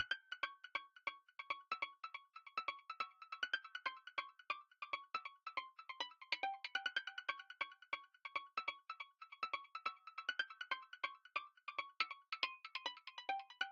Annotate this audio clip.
Pizz Loop
pizzicato violins from edirol's orchestral with many processes.
pizz, electronic, loop